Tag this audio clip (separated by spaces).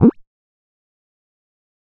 8bit synth bit gameboy soft 8 nintendo